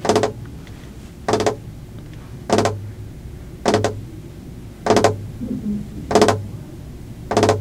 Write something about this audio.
YVONNE Fingertapping
The sound of fingertapping on a desk.
Fingers, waiting, tapping